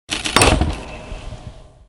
A thin wooden door, locked from the inside with a bolt. Someone forces it open from the outside. This is the sound of the bolt tearing through the wooden door-frame. I mixed the splintering of wood with a dull clang of metal on metal to simulate the situation. Recorded with a Rode Video Mic attached to a camera. My first time recording a sound!